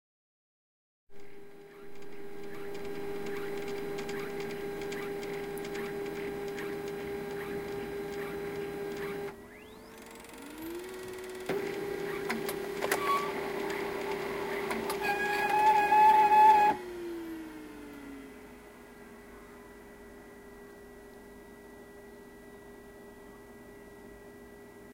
samsung laser printer squeaky clog
loud squeaky clog of the poor samsung laser printer
clog, environmental-sounds-research, field-recording, laser-printer, machines, office, printer